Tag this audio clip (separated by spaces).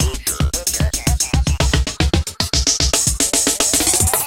drumloops extreme idm